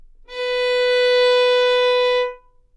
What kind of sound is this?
Part of the Good-sounds dataset of monophonic instrumental sounds.
instrument::violin
note::B
octave::4
midi note::59
good-sounds-id::3657